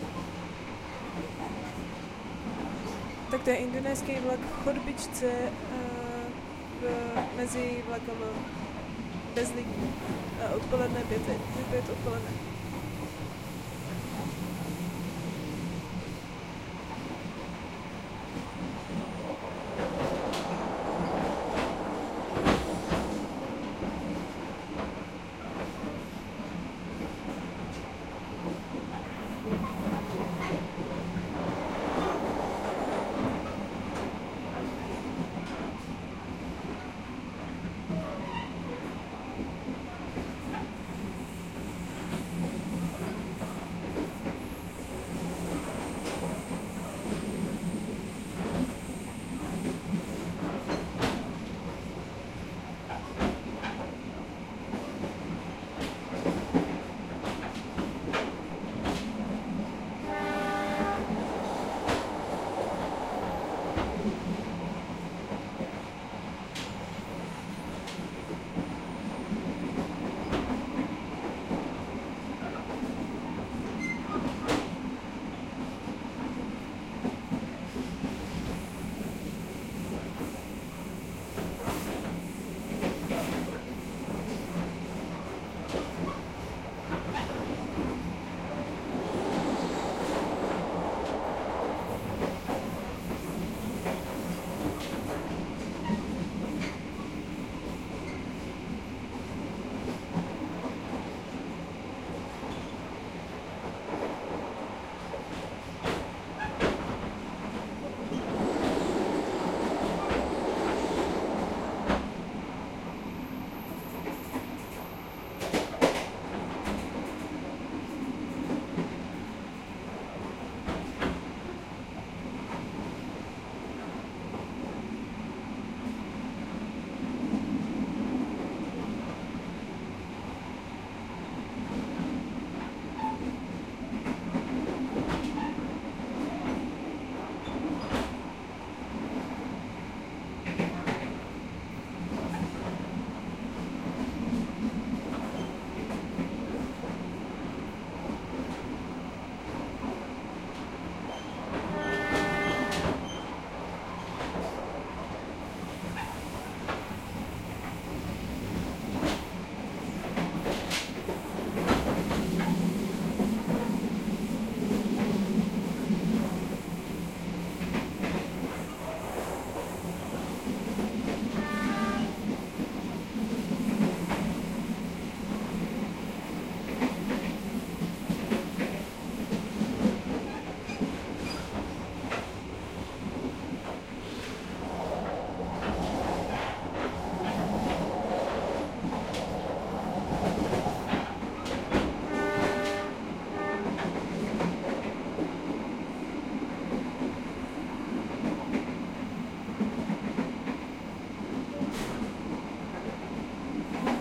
On the way from Jakarta (Indonesia) by train. Recorded inside by ZOOM F4 and Sennheiser MKH 8060.